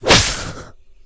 Sword Sound effect slash , enjoy!